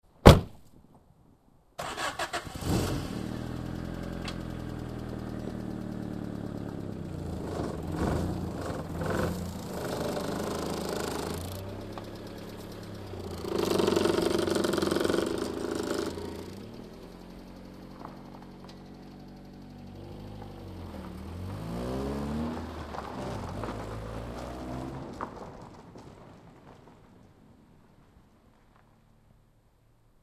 honda concerto
car in the forest...
car, concerto, departure, engine, field-recording, h2, honda, zoom